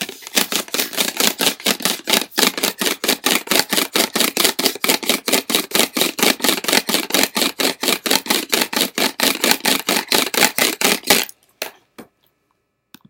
Two computer speakers rubbing together
class, MTC500-M002-s14, sounds